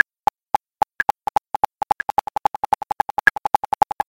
Sidash Tick
Tick (V'')
Click track - 220/12/32
Echo
Envelope Tool